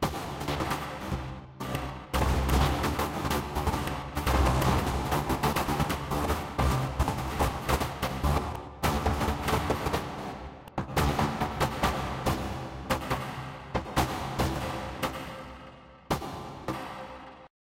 fluourlights starters motors jamming
from some file I had on my pc I came accross a sound that was like the sound a starter motor for a fluourescent light so I accentuated it to try and make it more like the real thing and copied to make a group of them go on at the same time
Synthetic, electroinic-emulation